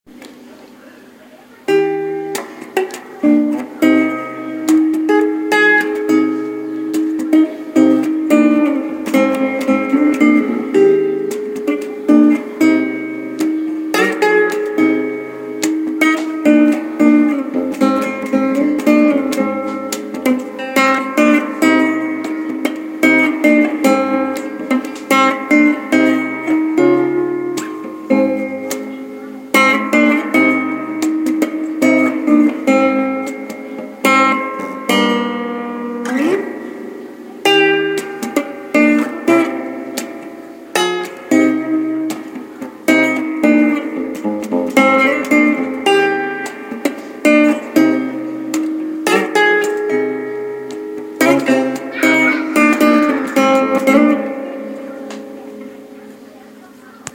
Two String Sing
Uneek guitar experiments created by Andrew Thackray
strings, instrumental